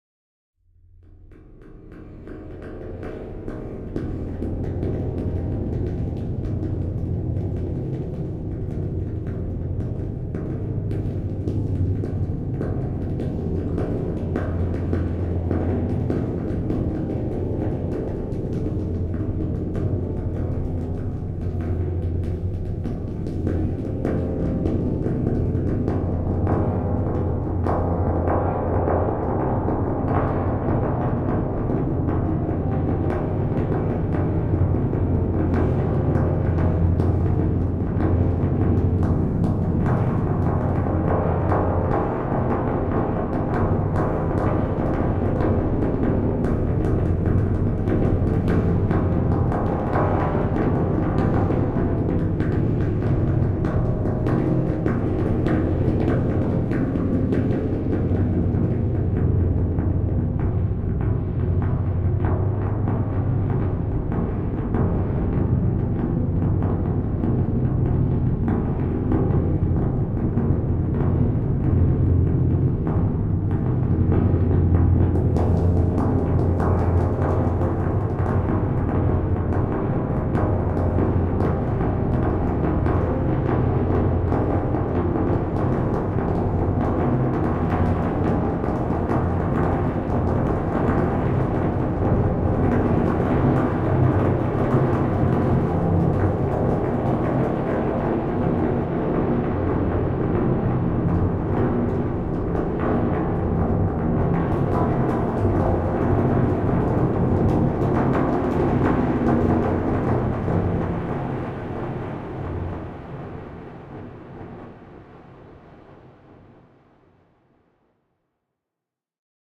Tapping on a metal statue 01 FX GRM
Recorded on SONY PCM-D50 in Prague 8 - Palmovka.
Aleff
abstract, ambient, bronze, drum, field-recorging, fx, groovy, improvised, INA-GRM, industrial, metal, soundscape, statue, tapping